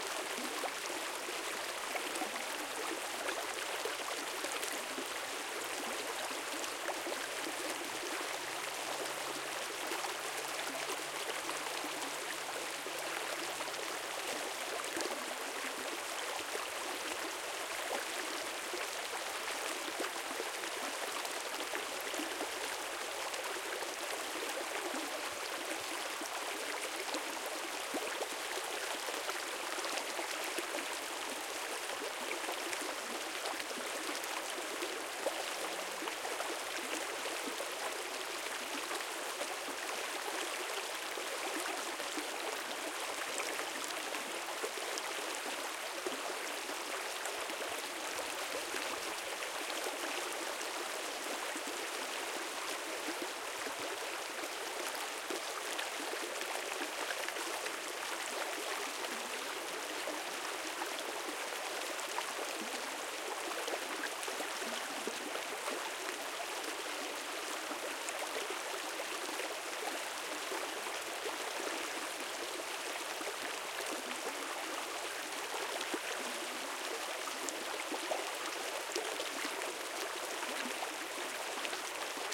river or stream thick soft flow bubbly2